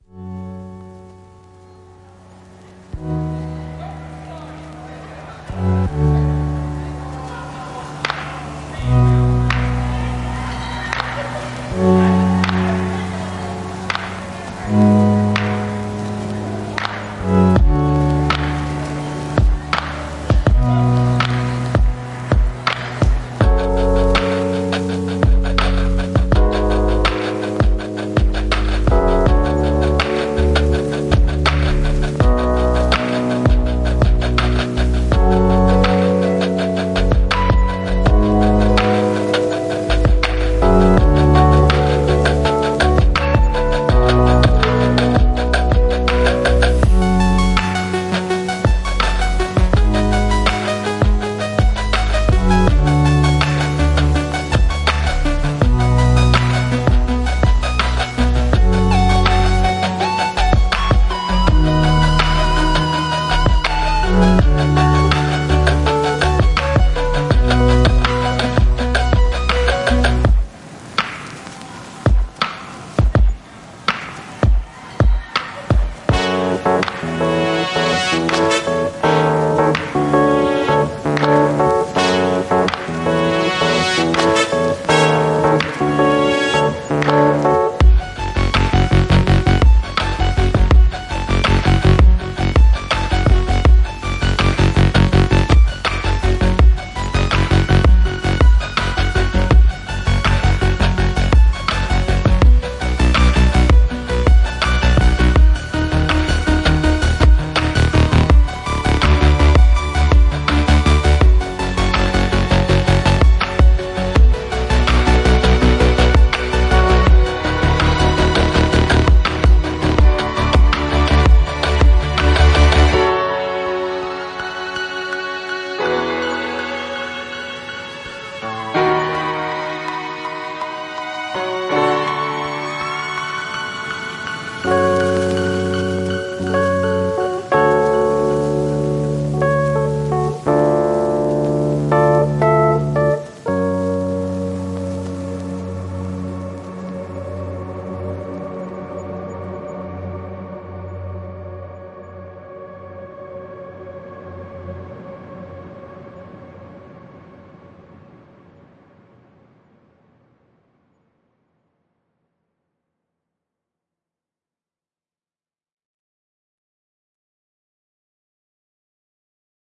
Noisy lofi Hip Hop
Short lofi hip hop inspired track made in fl studio
ambient, arpeggio, bitcrusher, brass, distortion, hiphop, lofi, noise, noisy, piano, rhodes, sidechain, stereo, strings, synths, vinyl, violin, wide